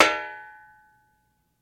Chair-Folding Chair-Metal-Back Hit-05
The sound of a metal folding chair's back being flicked with a finger.
Hit, Metal, tink, bang, Impact